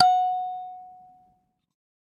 SanzAnais 78 F#4 forte
a sanza (or kalimba) multisampled